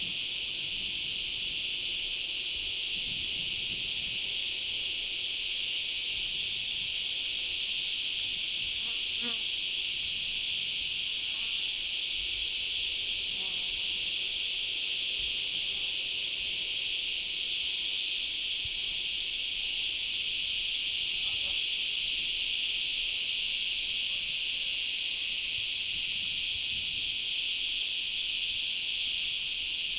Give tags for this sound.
ambiance cicadas donana field-recording insects nature scrub summer

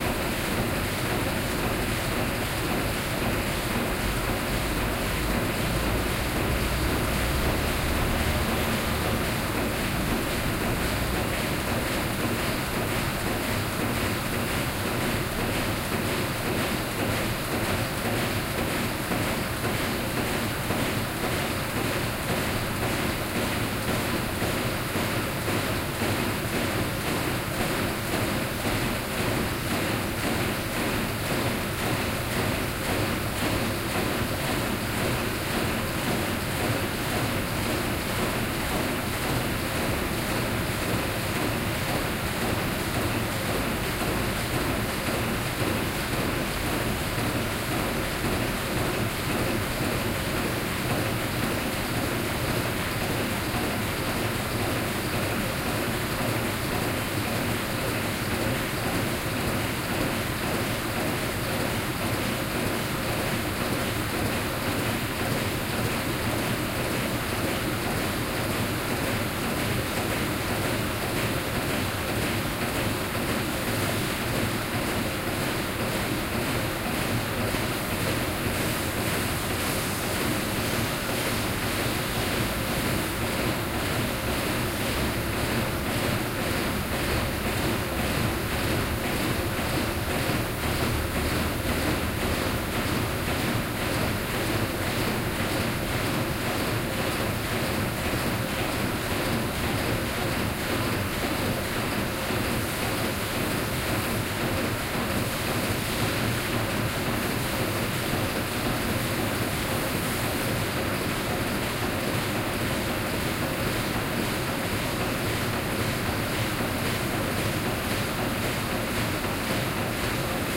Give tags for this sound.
22 ambiance ambience barcelona binaural city factory field-recording noise okmII poblenou soundman waldes

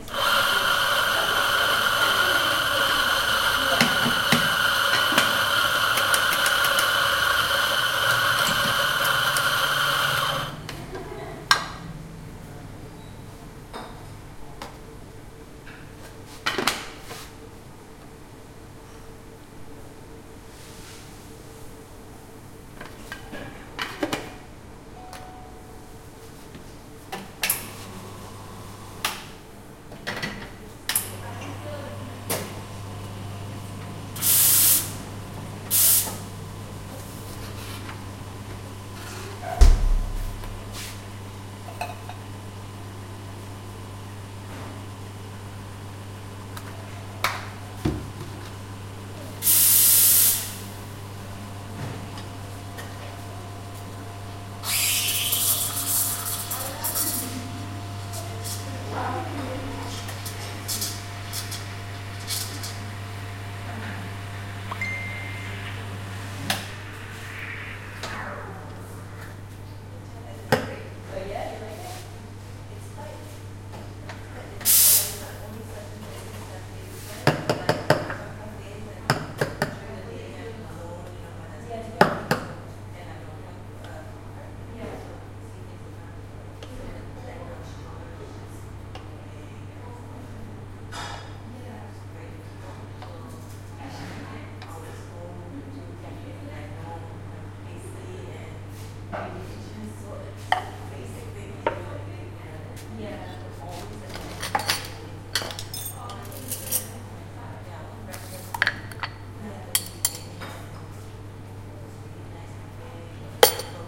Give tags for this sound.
background bar brewing buzzing cafe coffee espresso fano italian italy machine milk people restaurant steam steaming talking tender voices

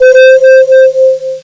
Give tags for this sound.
casio magicalligth tone

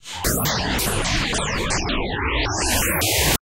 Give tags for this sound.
additive digital noise synth synthesizer synthetic

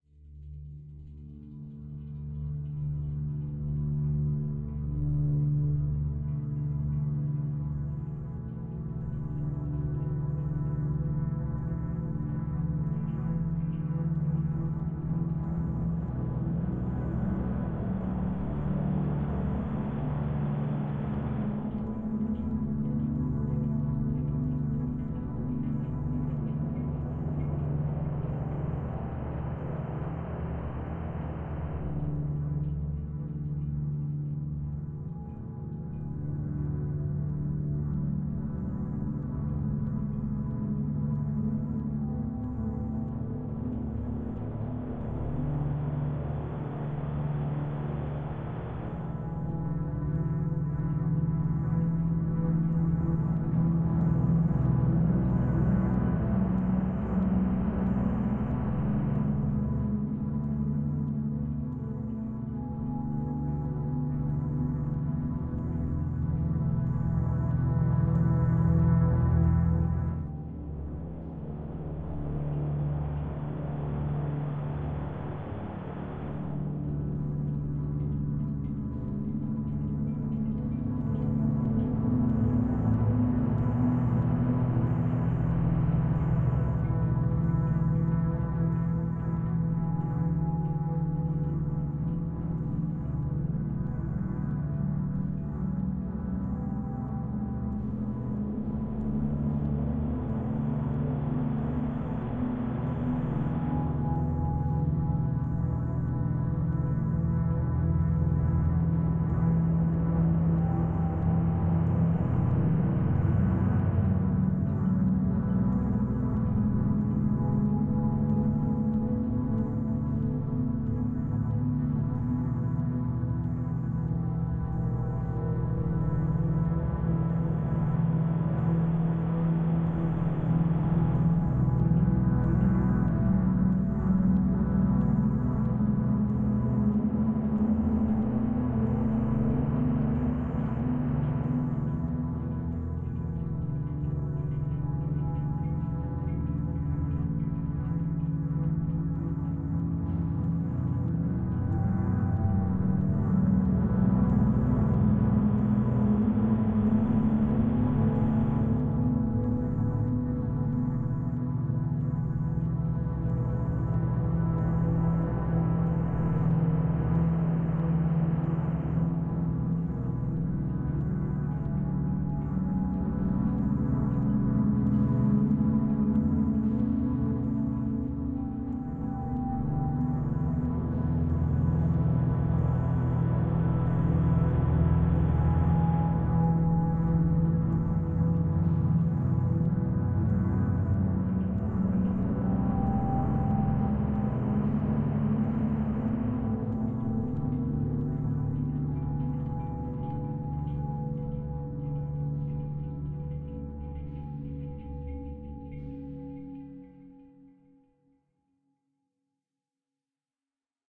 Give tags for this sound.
background bogey drama loading Dreamscape sound Ambient ambiance level scary horror sounds creepy terror space background-sound

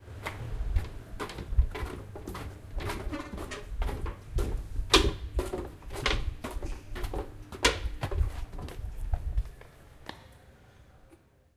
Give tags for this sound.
campus-upf footsteps steel UPF-CS14 walking

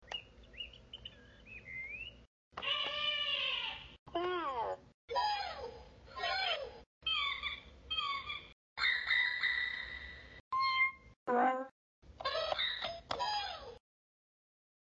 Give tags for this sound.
bird kid low-quality meow parrot penguin reverb

